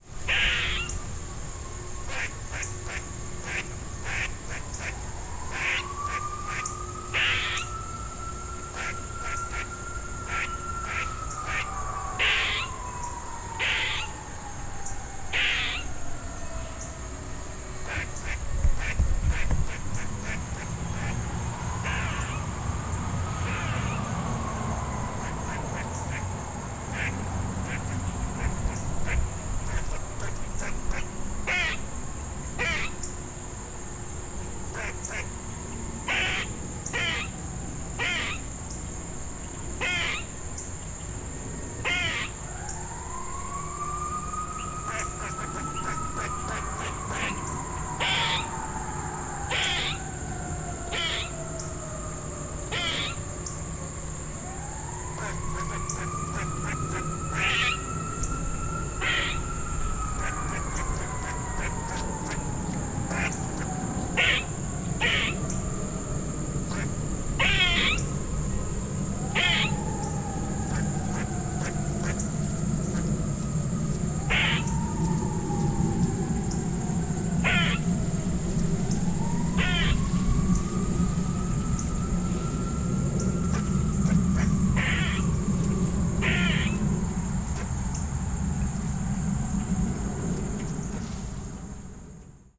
24_48- Recording of a squirrel ( I think it was a female) making some loud noises in a suburban area. This was a spur of the moment recording, so there is some handling noise. You can also hear cars, birds, crickets and fire engines in the background.